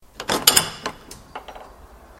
Door, Church, Open, B
Second raw audio of opening a wooden church door with a metal handle.
An example of how you might credit is by putting this in the description/credits: